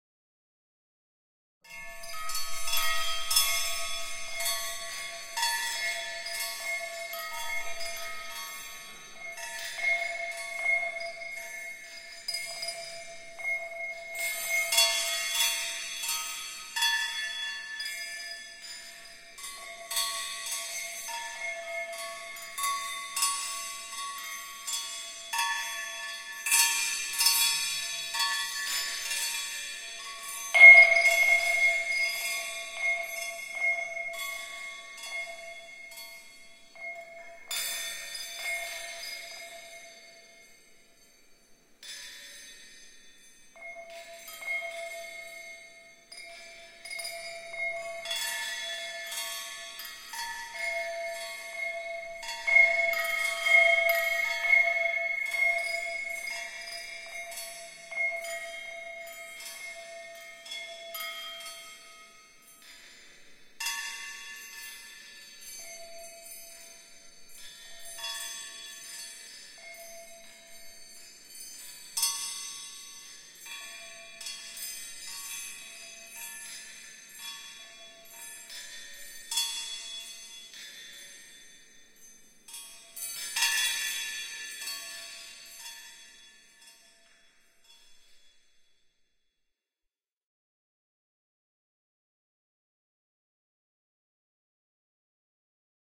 Atonal sound of home-made chimes, made from a wine glass, some metal BBQ skewers & some misc kitchen utensils.